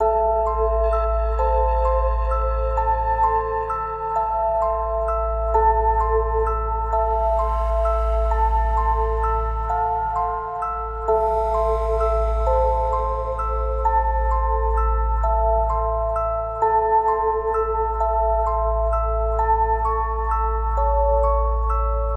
a rose 130 ternary
A ternary spooky loop that could be used to create atmosphere or a track. It does not contain drums. It's a song I started to do but can't finish it.
130bpm, 6-8, creepy, fear, haunted, horror, loop, spectre, spooky, ternary